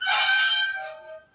An old seesaw squealing